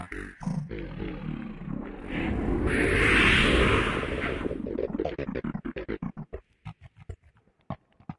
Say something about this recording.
grod beast 1
The sound of a virtual beast breathing in a low growl. Made from a slowed down human voice. Sounds a little like a lion or tiger. Part of my virtual beasts pack.
ambience, animal, atmosphere, breath, dark, electronic, growl, human, lion, noise, processed, sci-fi, synth, tiger, voice